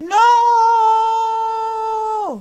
nooo with formant shift
long-no, request